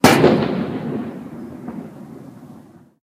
Slam & Fire
The mixing together of putting a cup on a steel table next to an iPod 5 microphone and a distant firework sound amplified using Audacity. Location: The Castle, Neutral Bay, close to the wharf, Sydney, Australia, 15/04/2017, 16:56 - 21:11.
Table, Cannon-Fodder, Cannon, Fire, Gunshot, Amplified-Firework, Slam, Boom, Ringtone, Table-Slam, Gun, Firework